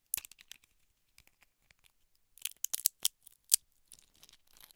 Breaking open a walnut using a metal nutcracker.
shell, walnut, crack, nut